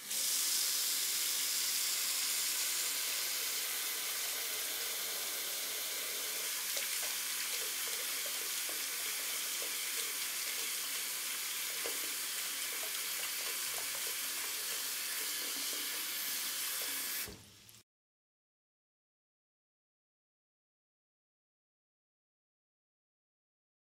Sink on off
turning off and on a sink
off, sink, water